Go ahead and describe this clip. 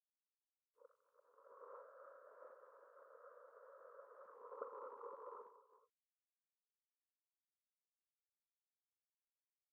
sample-space-aliens-worms-bug
Recorder with Zoom h2n
Processed in Reaper
From series of processed samples recorded in kitchen.
sci-fi, fx, aliens, bug, space, weird, worms